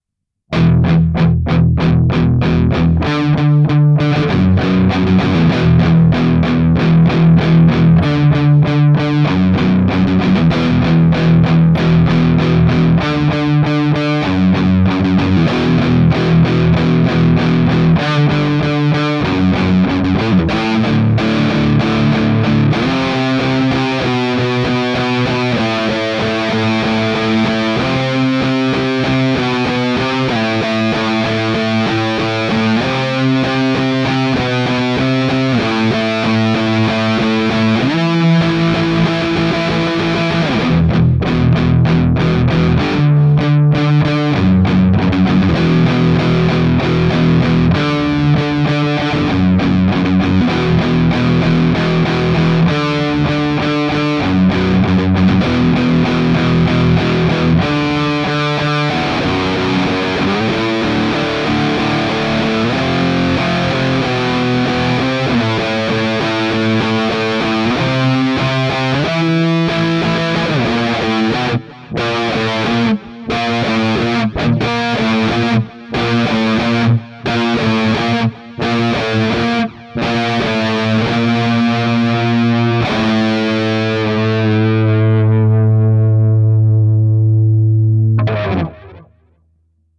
simple type of chord progression, low gain type of distortion with a mild flange effect. Probably good for a break or intro but could be used for more if broken up. Changes tempo and tone near the end into something completely different.